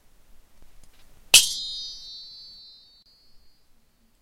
Sword Clash 3
Two swords clash together! Use for whatever you'd like.
Ting, Weapon, Hit